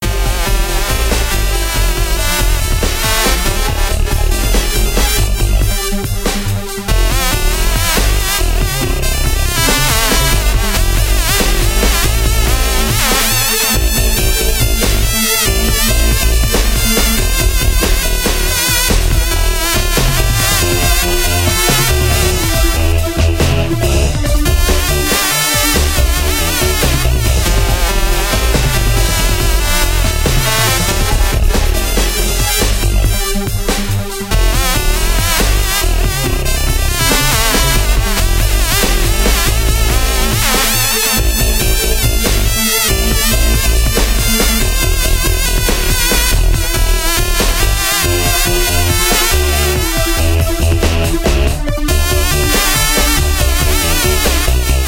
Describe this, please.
Road Trip
Original Electronic Music Loop at 140 BPM key of G.
140, Bass, BPM, Drum, Electronic, Loop, Music, Synth